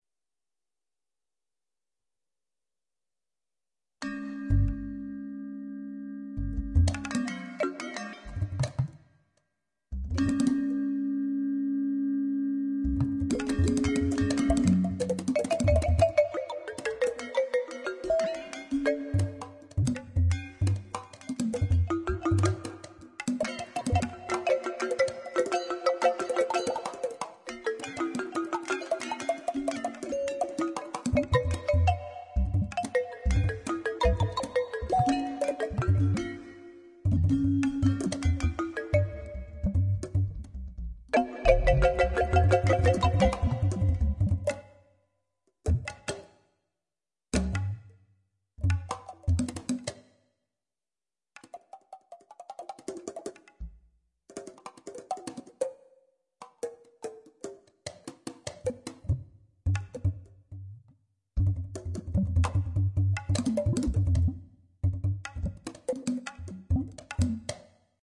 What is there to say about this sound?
Recorded on a MIDI guitar through a Roland GR-33. Encoded in Cakewalk ProAudio9. Recorded this late at night, made very little sense at the time, but it is somewhat musical.ZZZZZZZZzzzzzzzzzzzzzzz............